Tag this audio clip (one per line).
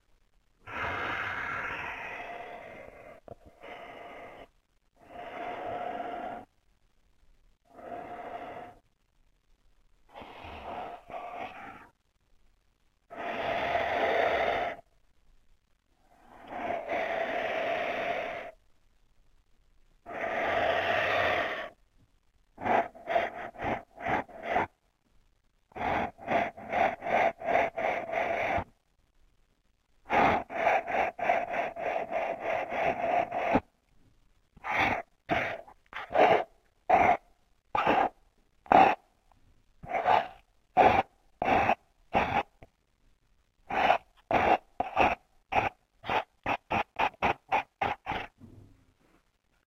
etc
snuffle